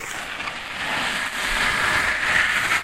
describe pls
A sound of, well....Scraping gravel. I recorded this on my Walkman Mp3 Player/Recorder in my driveway.
drag, gravel, scrape, scratch